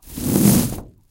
The sound of a microphone being dragged across a glossy poster on top of textured wallpaper.